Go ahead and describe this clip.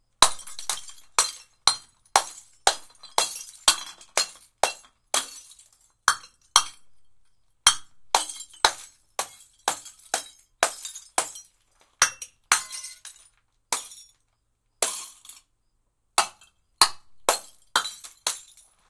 Multiple breaking bottles, hammer hitting glass until it breaks, medium to high pitch, tinging, falling glass